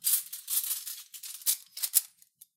I recorded these metal sounds using a handful of cutlery, jingling it about to get this sound. I was originally planning on using it for foley for a knight in armor, but in the end decided I didn't need these files so thought I'd share them here :)